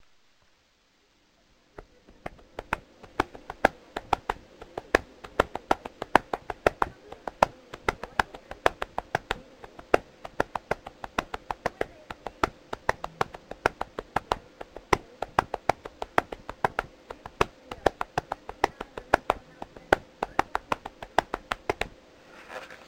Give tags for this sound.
knee
percussion
percussive
slapping
tapping

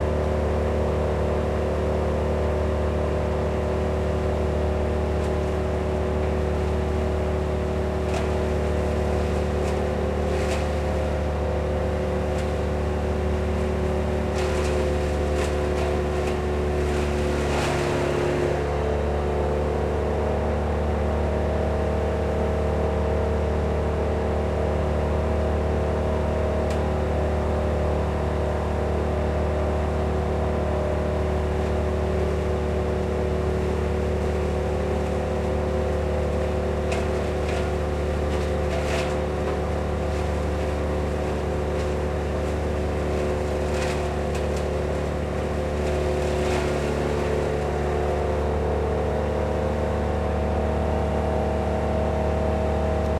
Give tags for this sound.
trees
wood
chipping
fargo
chipper

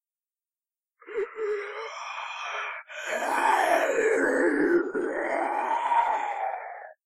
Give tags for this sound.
creepy
dead
evil
gasp
gasping
groan
growl
growling
horror
monster
roar
scary
snarl
snarling
undead
zombie

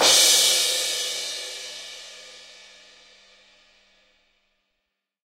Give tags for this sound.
percussion
kit
drum
crash

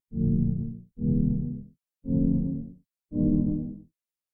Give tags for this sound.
chords
deep
digital
filtered
intro
round

